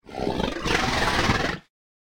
Generic snort
Inspired by the Monster Hunter videogame franchise. Made these sounds in Ableton Live 9. I want to get into sound design for film and games so any feedback would be appreciated.
monster, giant-monster, beast, snort, creature